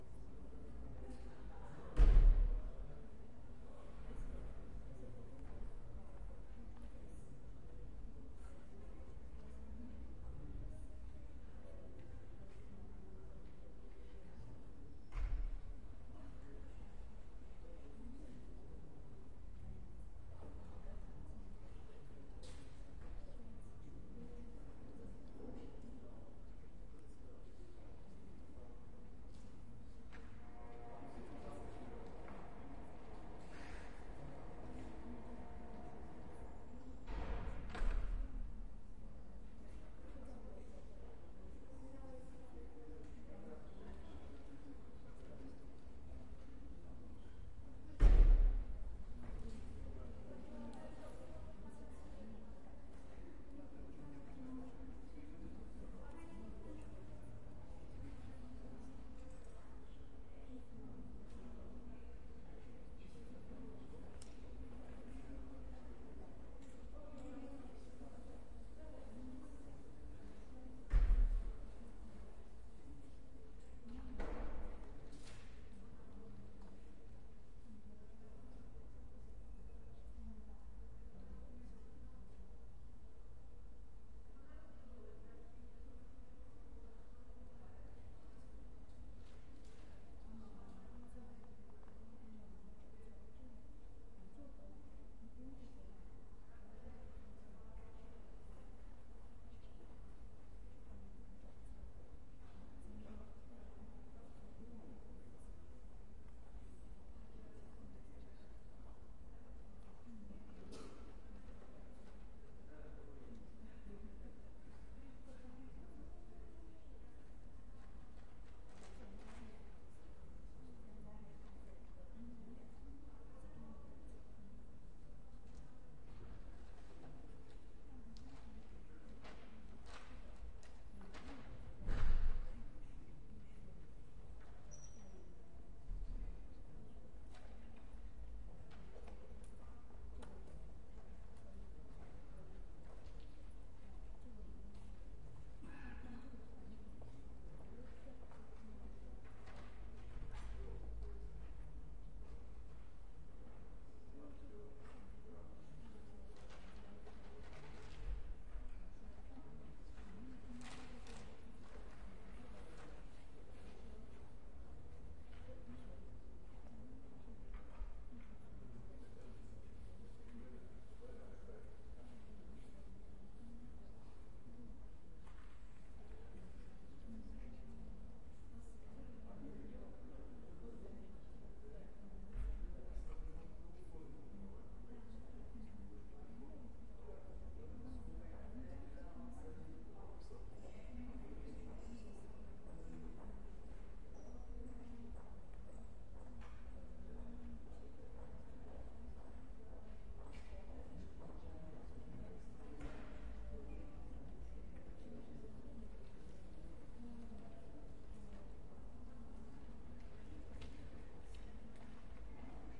SE ATMO calm university square doors strange gate Olomuc
background, background-sound, general-noise